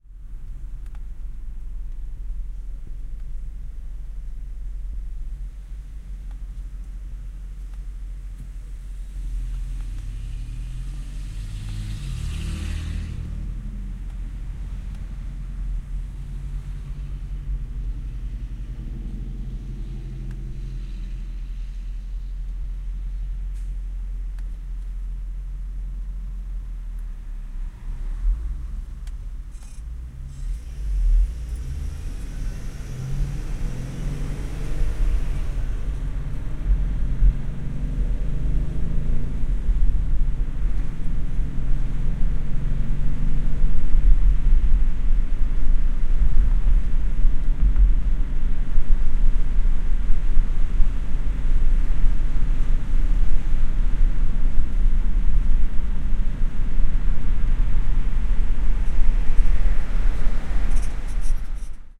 highway 441 red light
Binaural audio. Red light in America means stop, so the car I am in does exactly that. Not much else to say. You can hear some cars passing, and one in particular gives some nice creaking as it accelerates. You can hear it in the right ear.